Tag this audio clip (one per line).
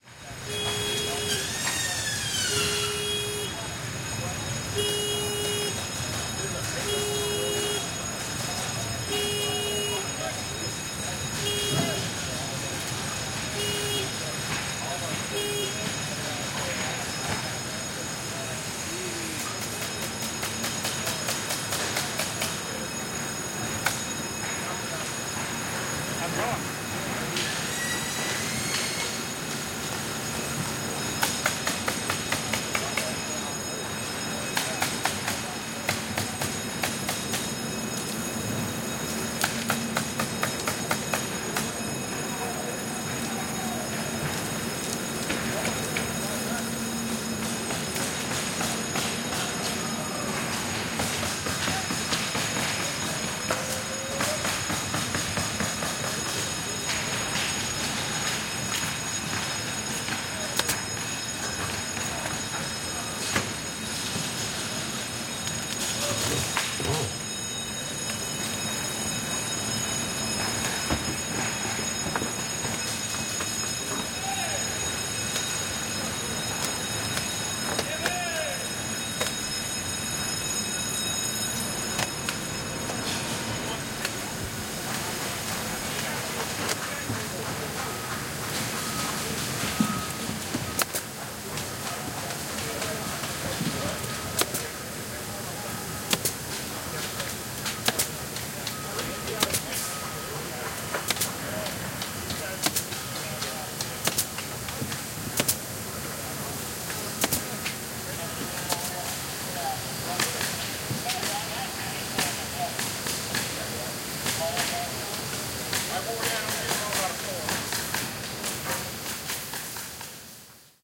BELLS
CONSTRUCTION
FACTORY
HAMMER
HOUSES
INSIDE
INSIDE-FACTORY
MOBILE-HOMES
MODULAR
PREFAB
SAW
WORKERS